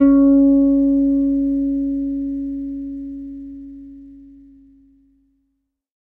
Third octave note.
electric, multisample, bass, guitar